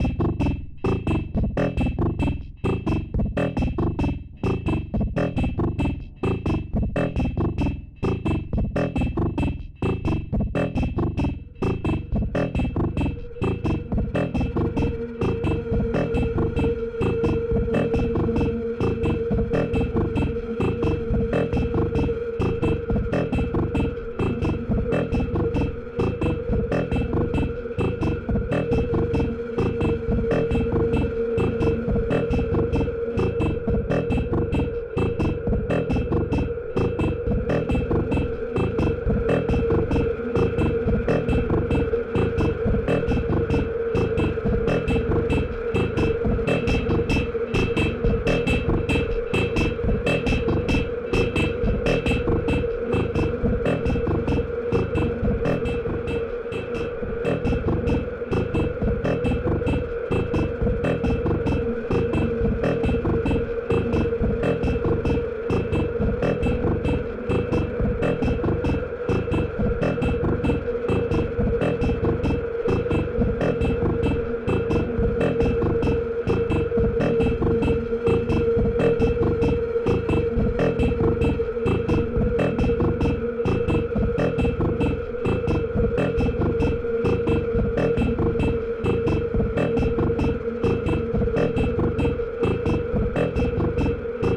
Grunting beat - baseline
Grunting baseline and electronic Black Noir percussion produced using Instruo Cš-L and dPo, passed through envelop of QMMG using Maths and Erbe-Verb. Enjoy!
atmospheric, baseline, beat, cs-l, erbe-verb, groovy, improvised, instruo, makenoise, morphagene, qmmg, quantized